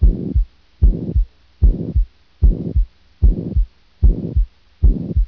Cardiac and Pulmonary Sounds
cardiac pulmonary anatomy
cardiac, anatomy